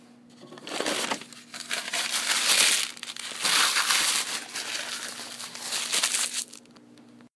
Paper towel crunching
Recorded at a coffee shop in Louisville, CO with an iPhone 5 (as a voice memo), edited in Audacity
towel, crunch, paper, foley